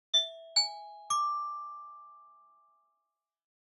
Playing glockenspiel from Sample Science Player V.2.
cute, glockenspiel, music, notes, play